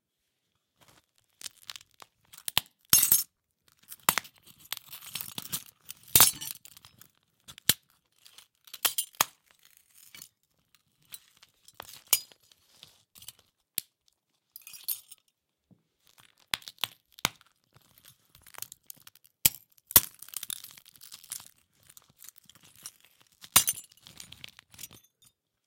Crack glass
Sound of cracking glass.
crack, glass